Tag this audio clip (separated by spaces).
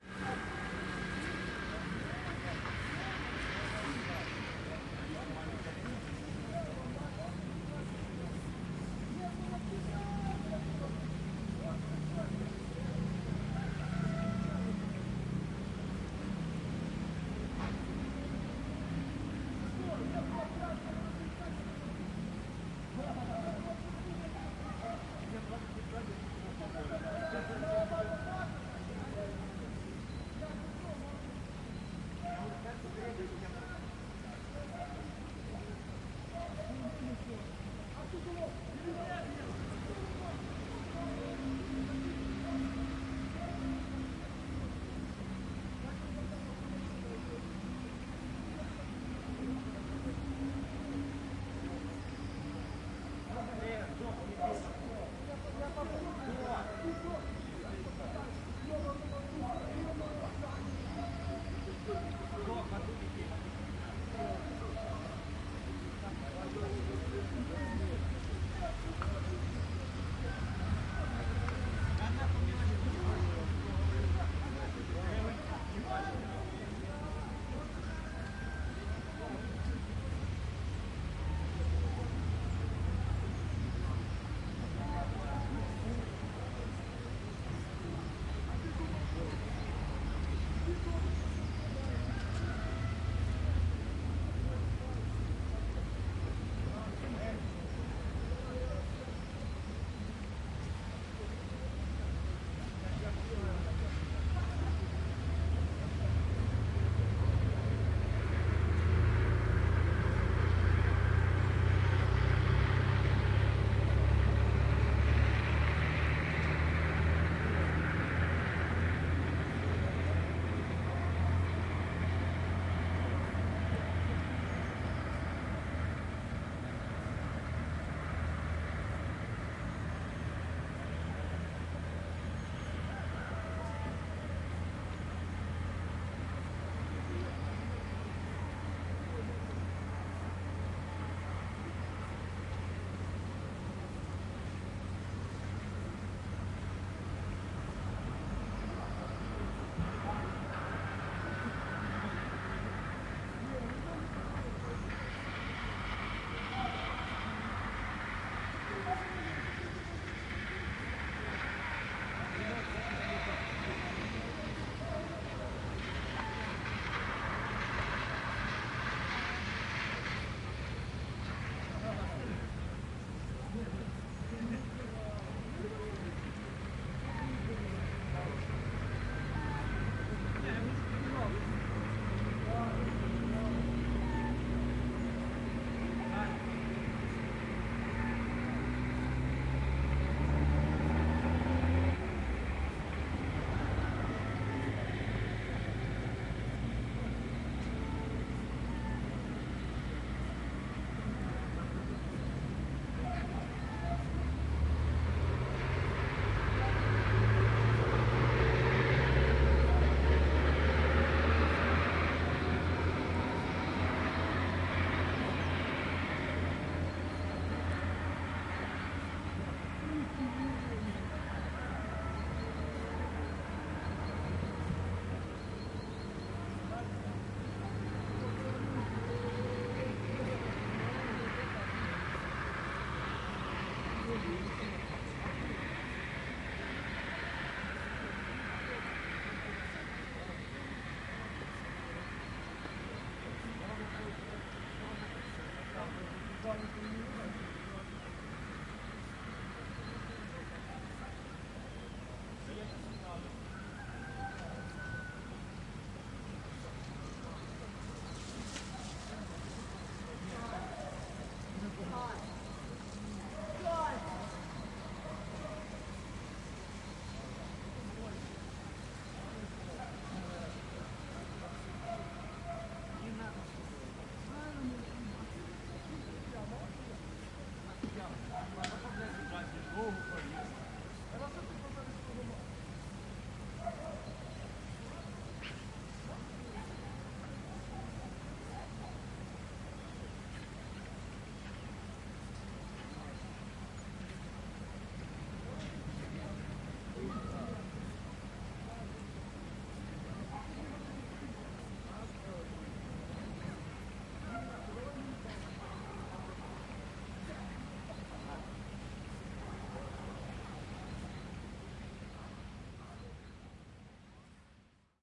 peoples ambience birds